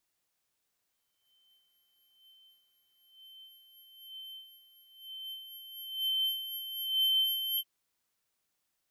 Ear Ringing (After explosion)
Created using Logic Pro. Ear Ringing sound effect similar to that when there's an explosion.
ear, insanity, ringing, simulated